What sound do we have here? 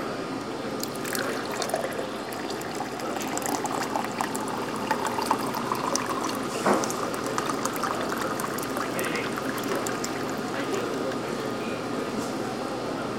coffee pouring 1
This is a recording of coffee being poured into a mug at the Folsom St. Coffee Co. in Boulder, Colorado.
coffee, shop, pour